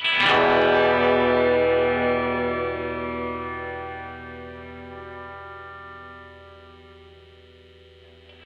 twangy electric guitar 2.16-2.20
guitar clean electric
electric, twangy